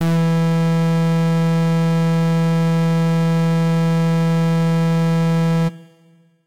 Full Brass E3
The note E in octave 3. An FM synth brass patch created in AudioSauna.
brass, synthesizer, fm-synth